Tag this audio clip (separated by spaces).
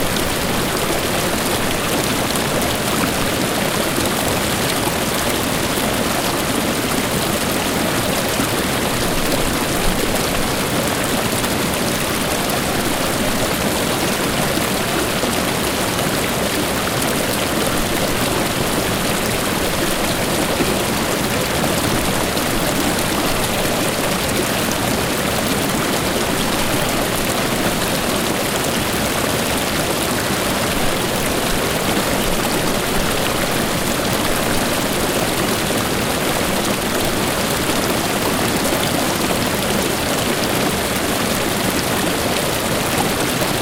mountain national waterfall falls park hike timberline colorado forest rocky